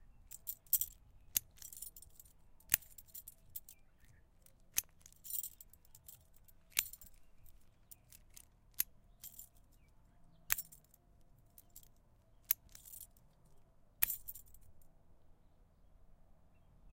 This was recorded with an H6 Zoom recorder at home. I had a small padlock and thought it would serve well as something unlocking or locking with the jingling of the keys.
clink, unlocking, OWI, clinking, key, jingling, metallic, keys, lock, padlock, locking, pad-lock, unlock, metal